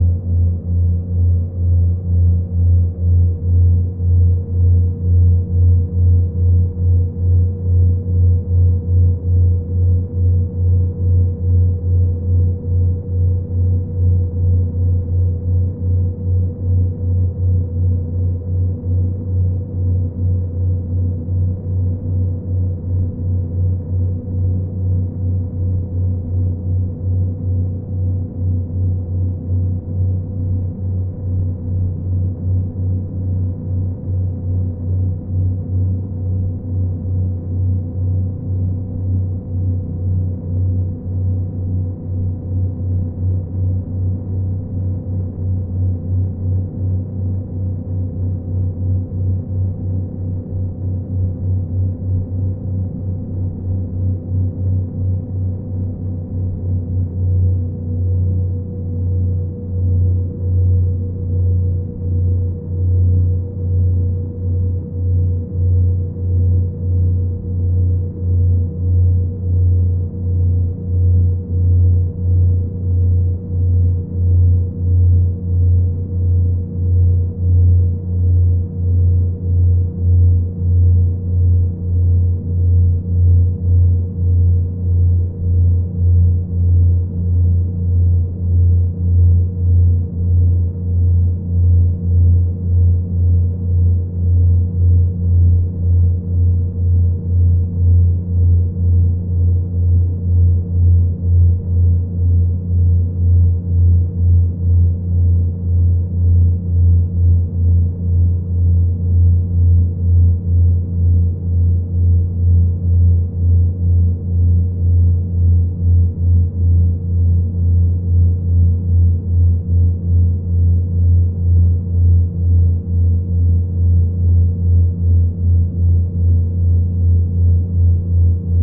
speed boat outboard motor medium engine throb1 bassy drone resonate
boat, engine, medium, motor, outboard, speed